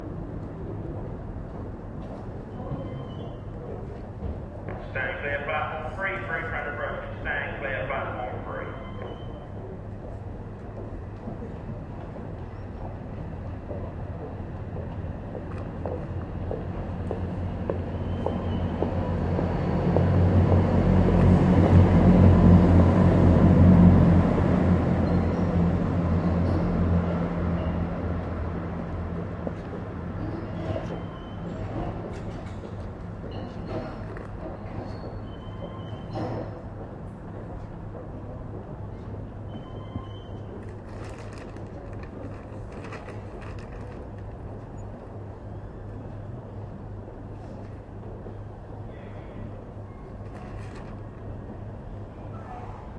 The recording starts with a loudspeaker announcement "stand clear platform 3, through train approaching. Stand clear platform 3."
Then the train goes past.
Some noises can be heard in the platform after the train goes past. Close to the end of the recording you can hear someone close by paging through their newspaper.
Recorded on 23 Sep 2011 with a Zoom H1 using the built in mics.